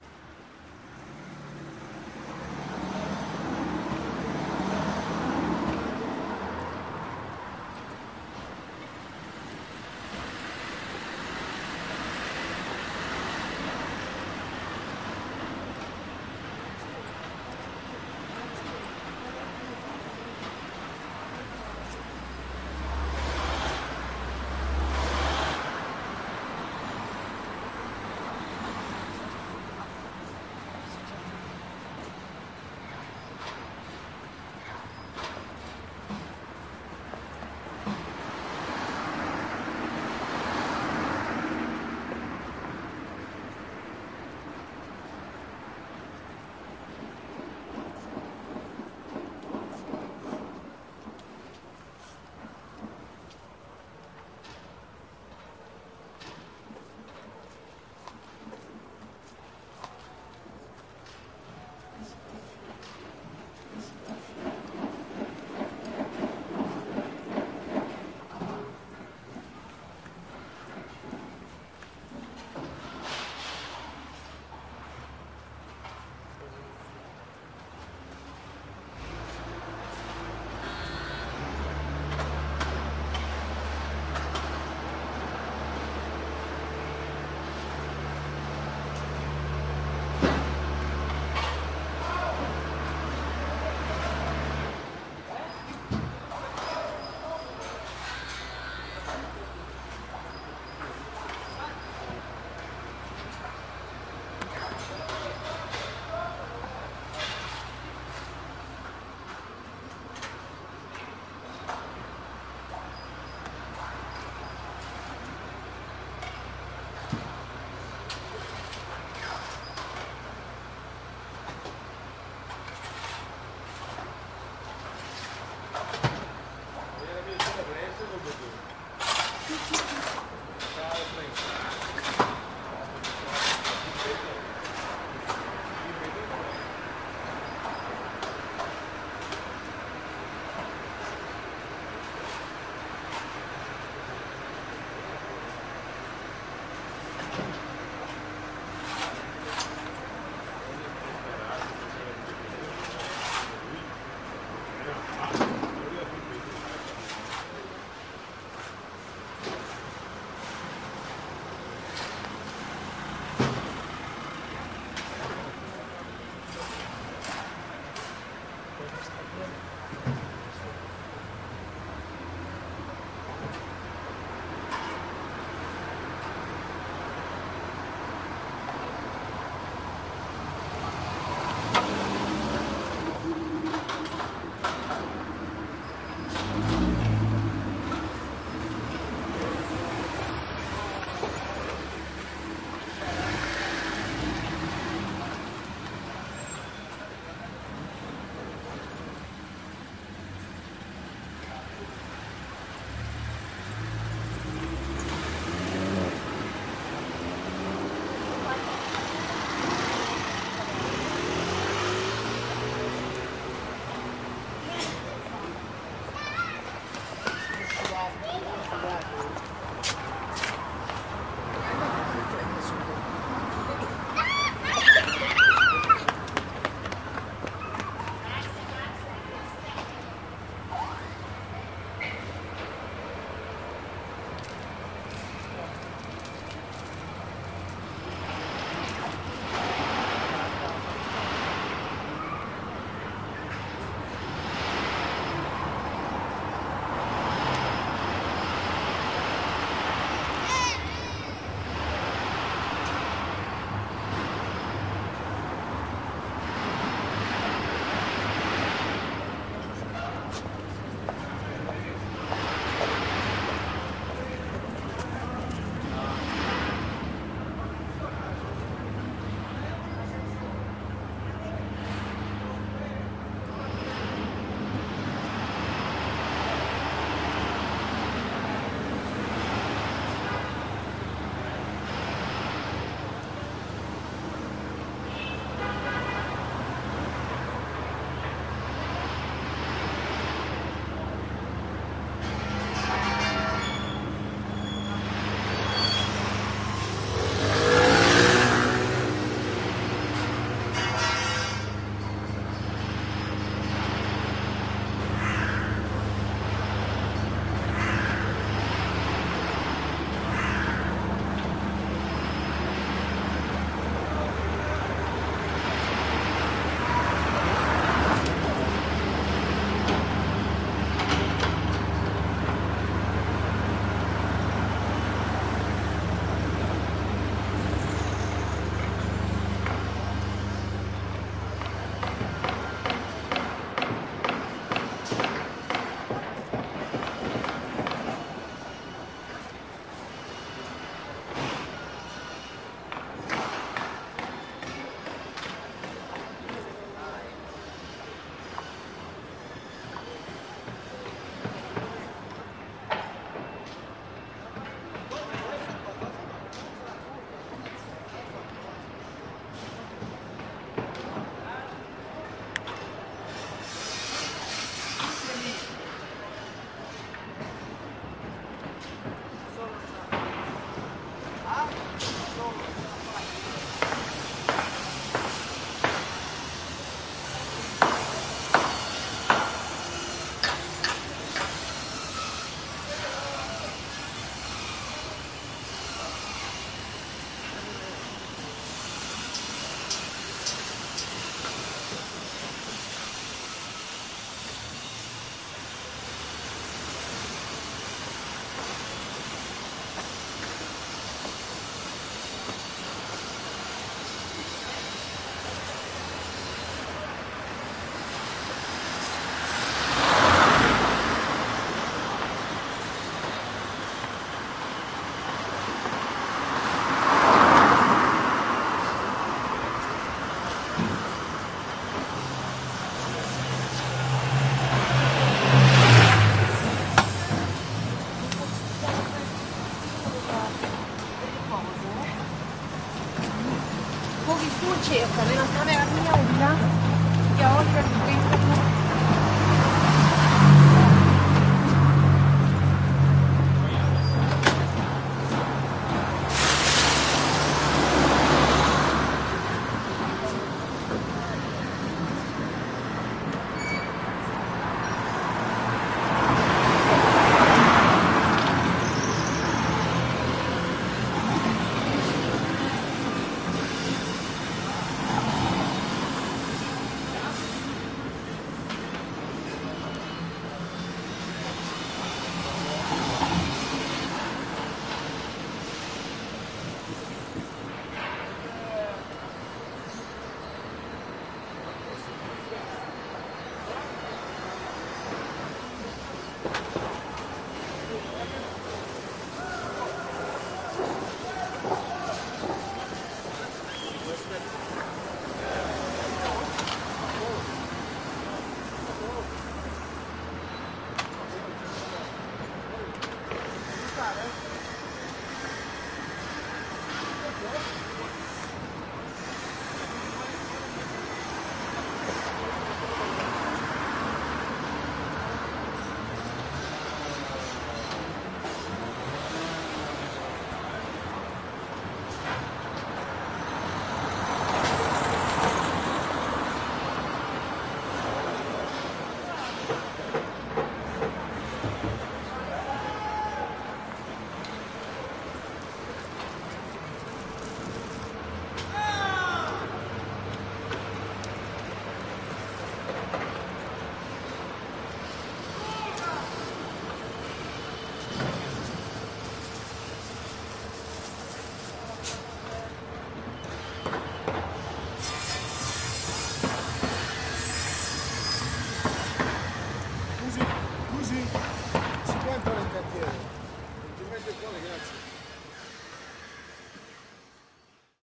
Soundwalk. part of the field recording workshop "Movimenti di immagini acustiche". Milan - October 29-30 2010 - O'.
Participants have been encouraged to pay attention to the huge acoustic changes in the environment of the Milan neighborhood Isola. Due to the project "Città della moda" the old Garibaldi-Repubblica area in Milan has turned into a huge bulding site.